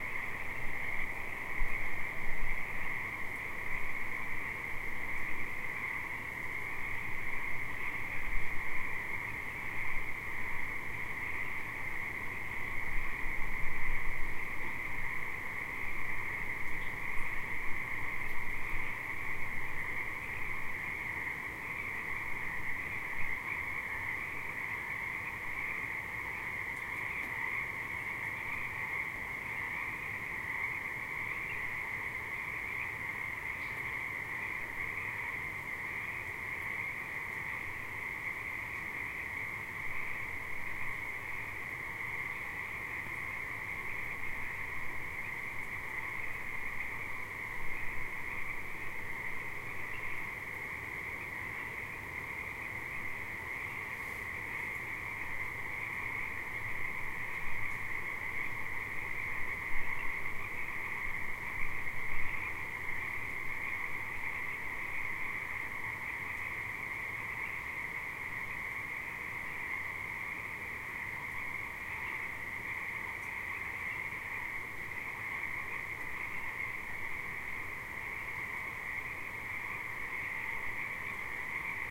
Frogs at Alsea River
Frogs at night time. Many thanks for lsitening!
night-sounds, ambiance, animal-sounds, nature, field-recording, frogs